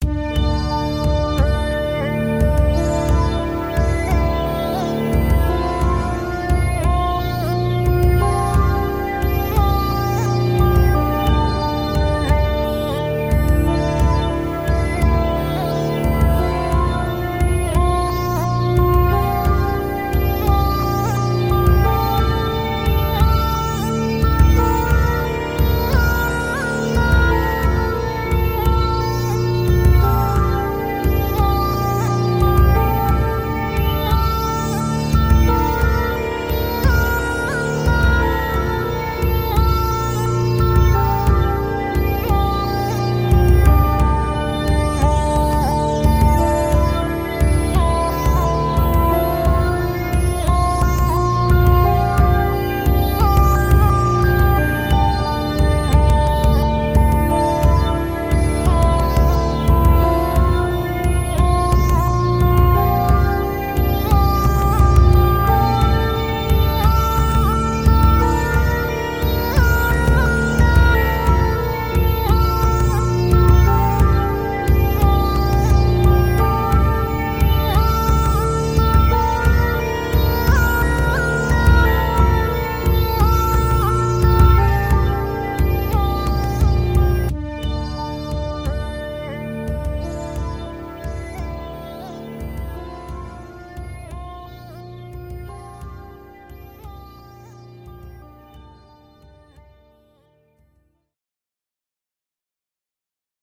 The Nightwalker
This one fades to silence at the end but you can still cut it and make it loopable.
For "derivatives" (in which we've used other people's sounds) find the links to check the original author's license.
epic, energy, Journey, motivational, uplifting, mystery, Challenge, Dynamic, travel, Quest, film, Pursuit, pounding, dramatic, game, adventure, bgm, Heroic, Powerful, action, Cinematic, suspense, Thrilling, fighting, battle, combat, boss